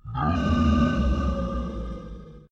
necro-nooooooo - Csh
necromancer death sound
death, necromancer, sound